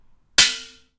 Knife Hit Pan Filled With Water 4
knife, struck, metal, impact, water, pong, hit, pan